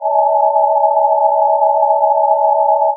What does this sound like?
Random Sound created with SuperCollider. Reminds me of sounds in ComputerGames or SciFi-Films, opening doors, beaming something...
atmosphere, ambient, horn, sound, sci-fi, supercollider, electronic, ambience